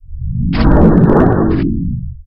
Made this in Audacity and WavePad for a portal or gateway that a player can pass through.
I imagined some kind of a rippling energy portal that appears to have the physical properties of gel or goo.
Made from two samples.